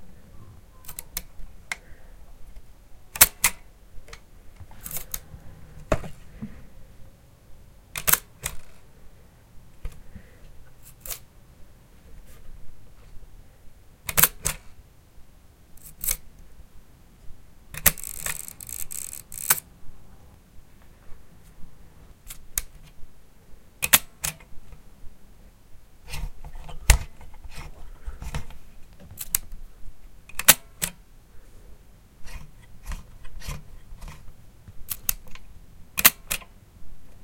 Me cocking and then firing the shutter of a 1930's Ihagee Prontor 2 folding camera at different speeds.